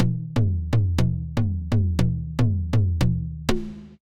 HOT percussion loop 3
Groovy percussion (120 BPM)
groovy, loop